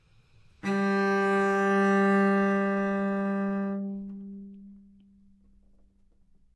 Cello - G3 - bad-dynamics-decrescendo
Part of the Good-sounds dataset of monophonic instrumental sounds.
instrument::cello
note::G
octave::3
midi note::43
good-sounds-id::2023
Intentionally played as an example of bad-dynamics-decrescendo
G3, single-note